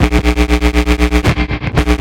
ground loop 9
created by shorting 1/4' jack thru a gtr amp
120bpm, buzz, ground, loop